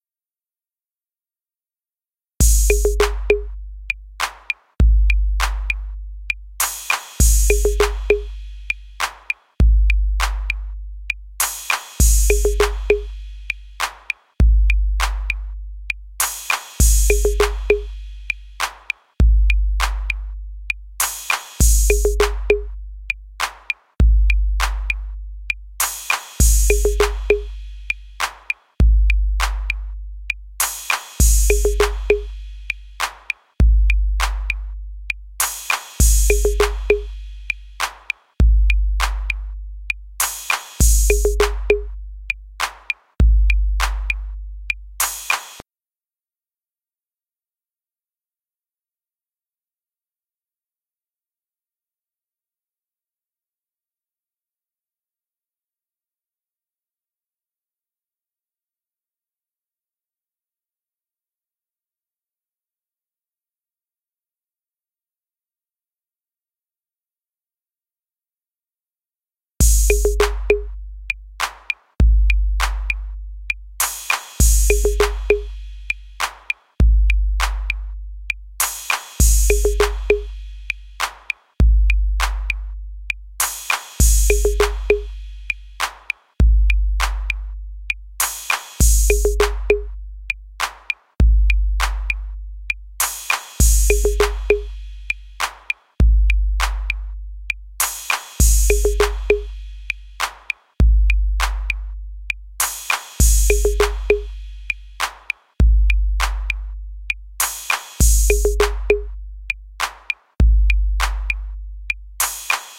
TR-808 Beat 2 xBPM
TR-808 track from a song I wrote. There are some silent bars. Enjoy!
127, 127bpm, 4, beat, bpm, electronic, equals, good, house, plus, snapple, snickerdoodle, tr-808